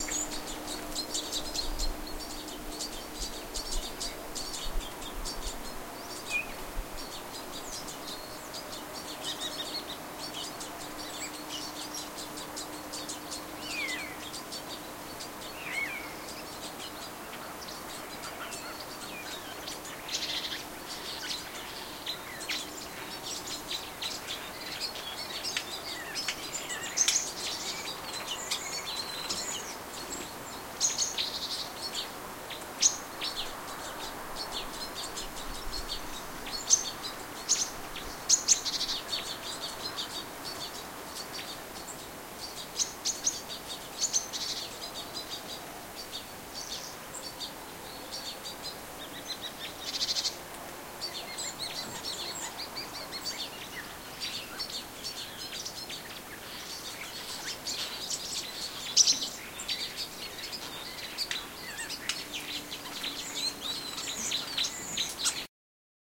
late morning in early autumn outside the village of sayda in the east german erzgebirge forest area.
birdsong, some frogs, forest noises and a distant highway can be heard.
recorded with a zoom h2, 90° dispersion.
athmo, atmo, birds, countryside, field, field-recording, frogs, nature, rural, woodland